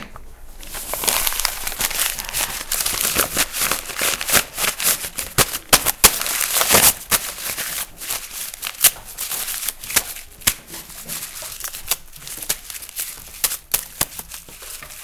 paper scrunching
scrunching paper up.
hand, paper, scrunch